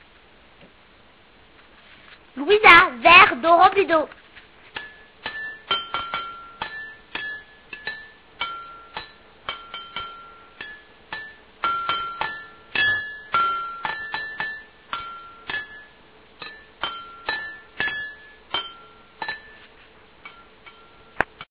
TCR sonicsnaps MFR louisa louisa-verre d'eau (20)
Field recordings from La Roche des Grées school (Messac) and its surroundings, made by the students of CM1 grade at home.
france, sonicsnaps